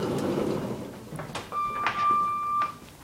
lift doors opening 03
Lift doors open and a beep. It's that simple.
beep, door, doors, elevator, kone, lift, open, opening